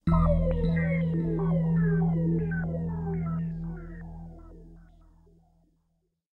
fade down echo psycedelic e
A low e played on zynaddsubfx. Psychedelic space fx in stereo.